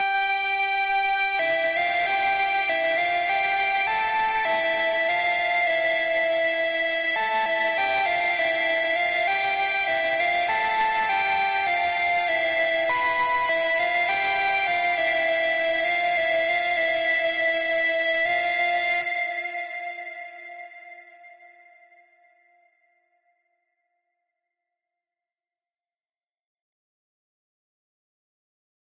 High pipe-like synth line released as part of a song pack
Electronic, Synth, Pipe